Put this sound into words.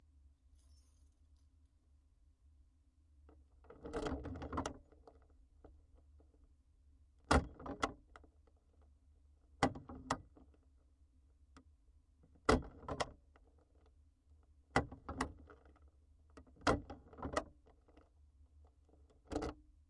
Dead bolt locking and unlocking
A heavy, chunky deadbolt locking and unlocking. Also a little key jingle at the beginning, and a key withdrawal at the end.